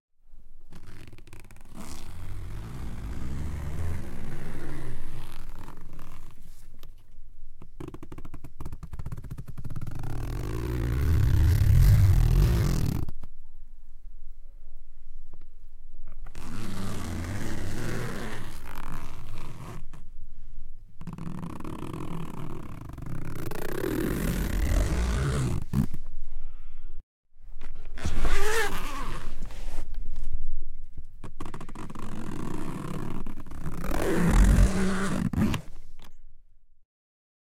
Zip Pull - Close Mic
Low noise floor, close mic zip pulls. Various speeds and strengths of pull.
close, mic, pull, zip